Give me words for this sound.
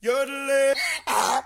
A strange combination of a yodel and a donkey.